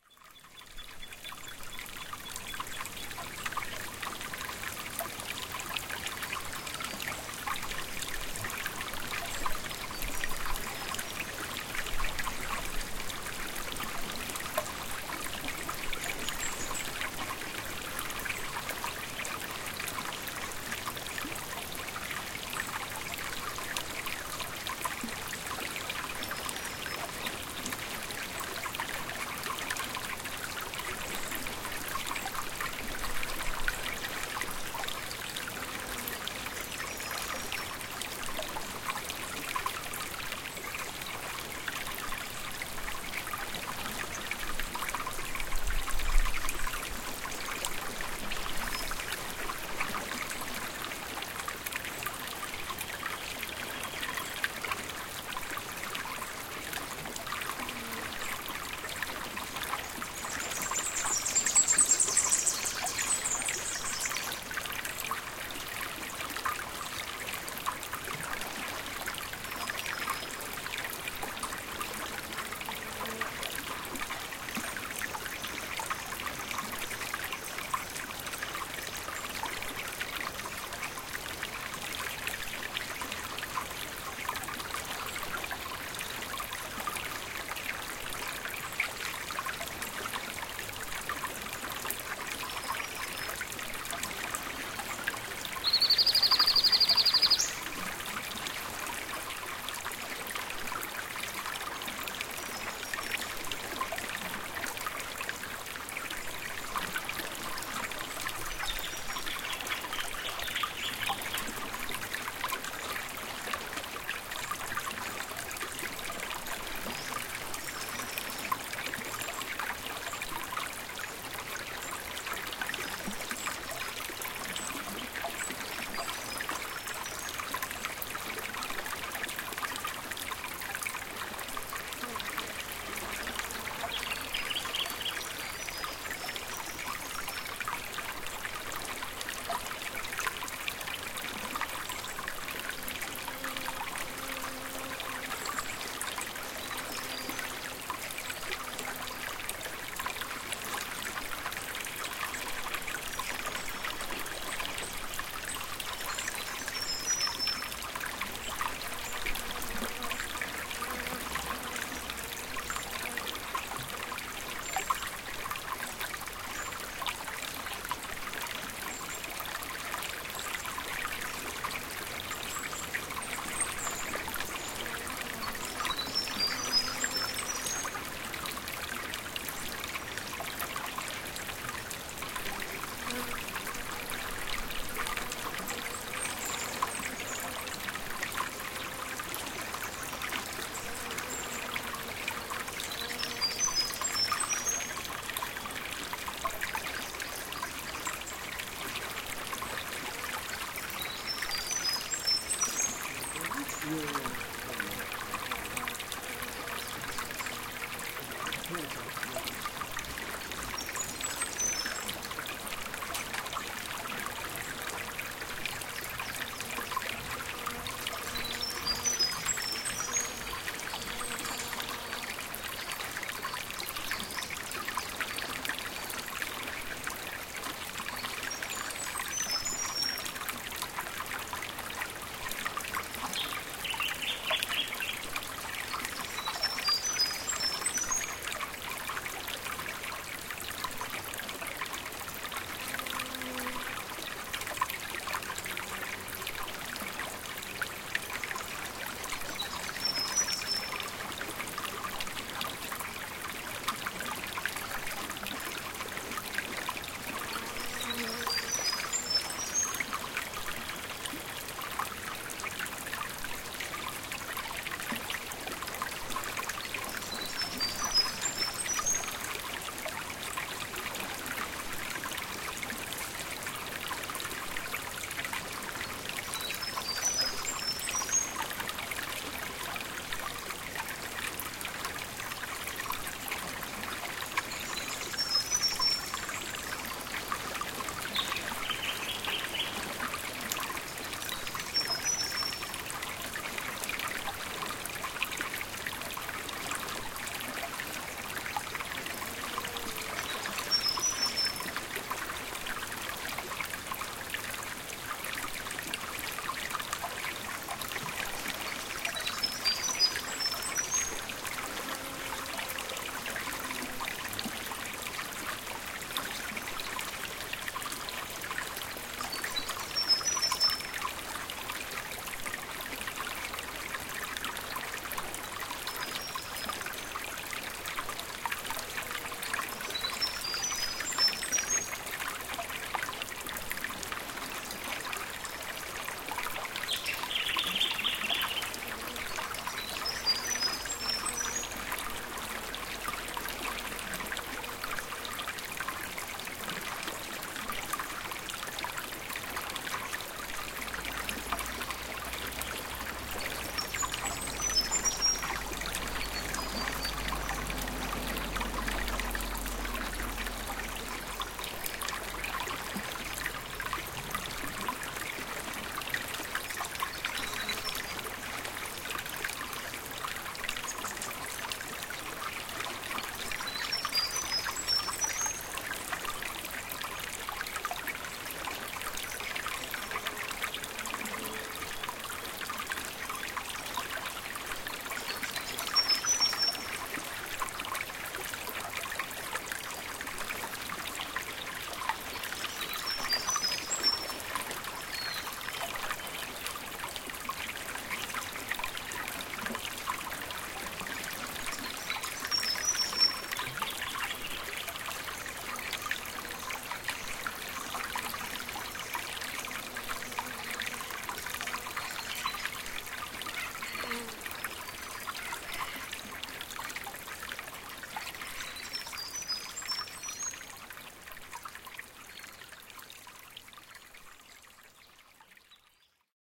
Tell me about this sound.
Texture of Water
A close perspective of a flowing stream with some birds in the background.
Australia, nature